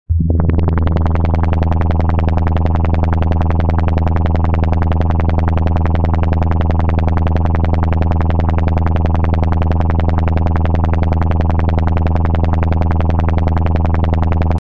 Modulation engine starts and runs.